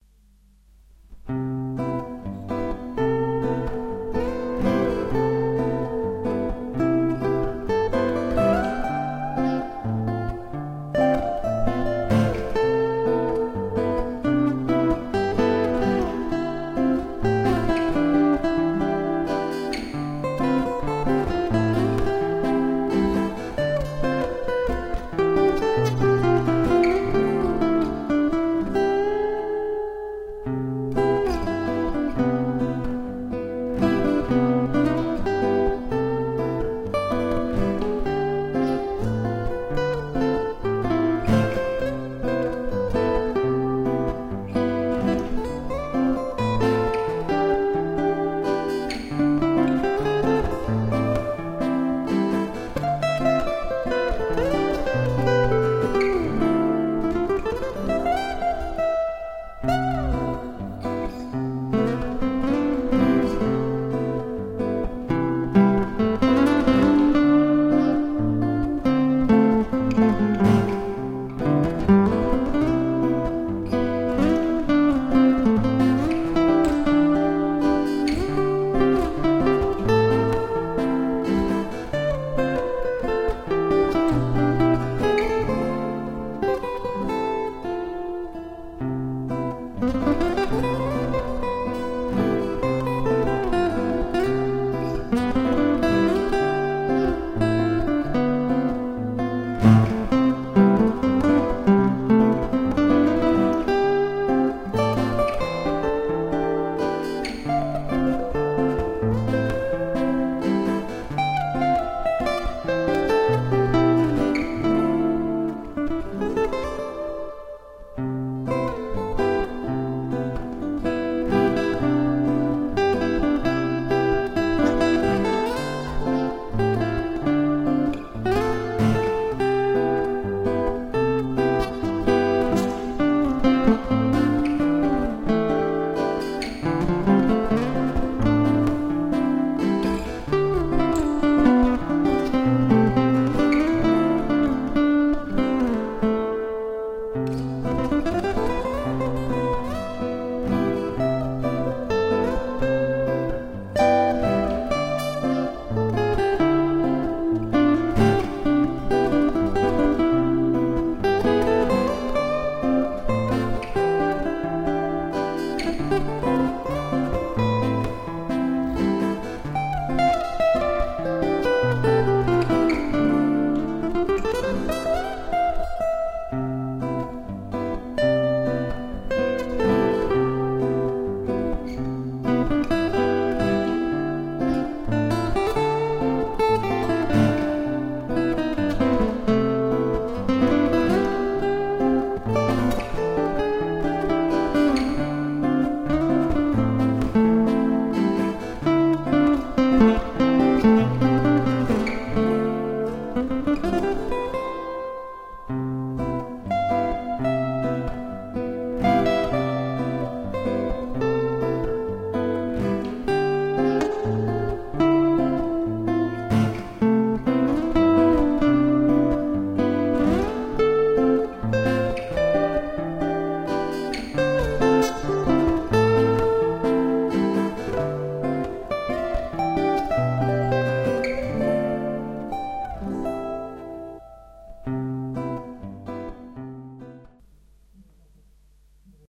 Light acoustic jazz instrumental composition. Rythm and solo guitar.